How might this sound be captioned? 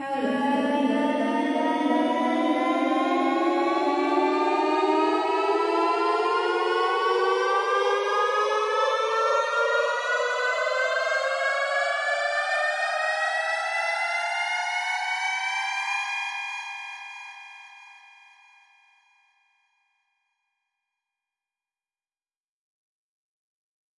Choir Riser
Two octave riser in key of C made with granular synthesis from samples I got off this website :)
house, edm, drop, trance, riser, tension, suspense, trippy, dance, build, dubstep